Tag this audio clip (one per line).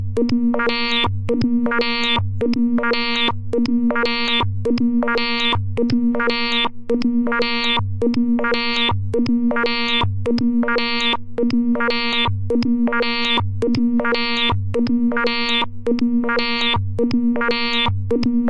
synth
sequence
random
synthesizer